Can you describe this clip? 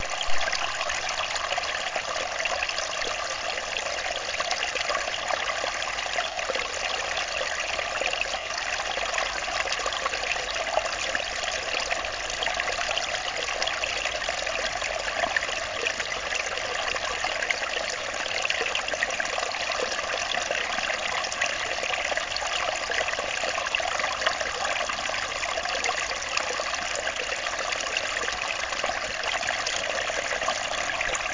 Running Stream in a Wood - Youghal, Co. Cork, Ireland
A recording of a running stream in a wood at Ardsallagh, Co. Waterford, Ireland.
close-up, gurgle